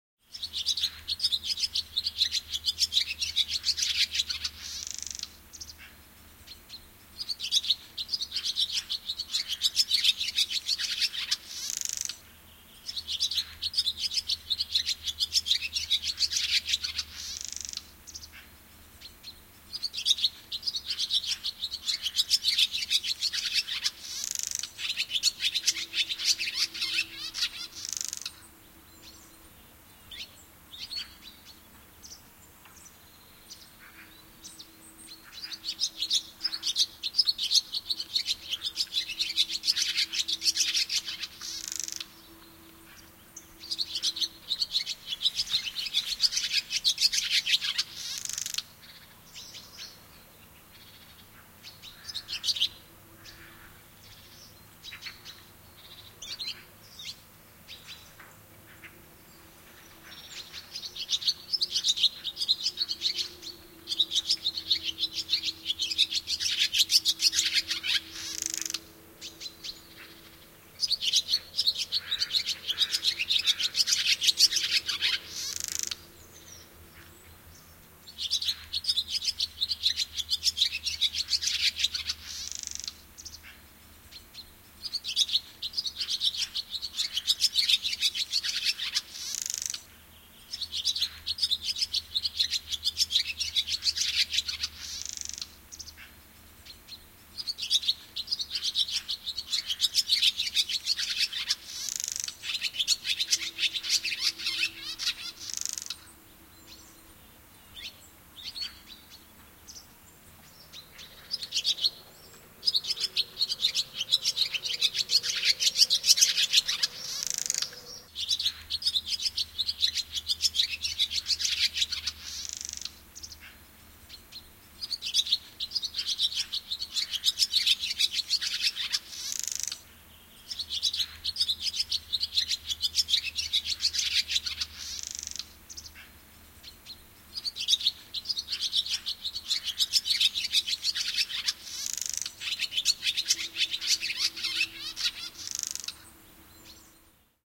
Haarapääsky, laulu, kesä / A swallow, barn swallow singing on a wire, some distant other birds in the bg
Haarapääsky visertää puhelinlangalla, pääskynen. Taustalla kauempana vähän muita lintuja.
Paikka/Place: Suomi / Finland / Vihti, Haapakylä
Aika/Date: 20.07.1999
Barn-swallow, Bird, Chirp, Finland, Linnunlaulu, Linnut, Nature, Summer, Swallow, Tehosteet, Viserrys, Yleisradio